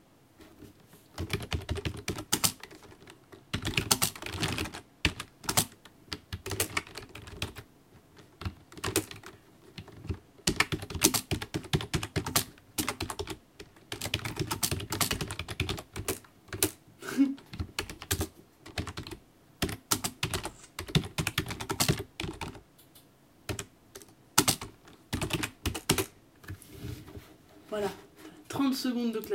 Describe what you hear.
clavier mécanique
Mecanic Keyboard recorded with a TBones SC 440
clic, computer, keyboard, mecanic, SF, tech, technologie